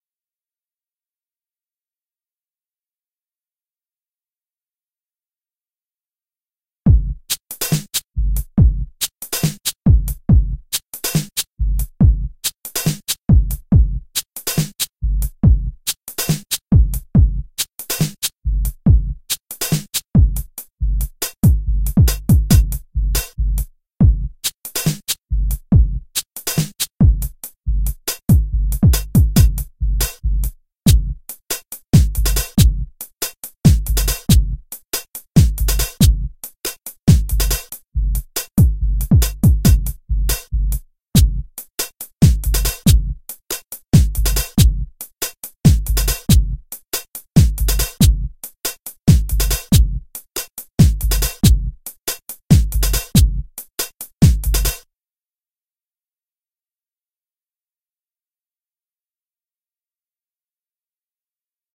alger-drums
full drums of Alger (Instrumental)
alger, b23, bnc, drums, salpov